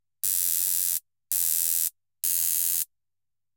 Civilian Stun Gun 1/2 second zap
This is an updated (improved is debatable) version of my previous electric contact stun gun firing sound, except longer and without peaking.
You'll immediately notice it sounds like the boring buzz of a bee. Oh well, not like you'll get a lightning storm.
That's about it. Don't forget to have fun.
Copy pasta
Also commonly and incorrectly referred to as a "Taser" which is on the same level as calling a magazine a "clip". See Google for more info.
I can't help that it sounds like a bee. Also if it's too short, you'll have to make it longer in your DAW.
Uses (apart from videos that involve a stun gun or maybe a real Taser brand Taser): magic lighting zaps? A game show buzzer?
Possible VST modifications
Reverb: Sound impulses for speakers
Distortion (Guitar style): Military style radio noise
Chorus/flange: Science sounding things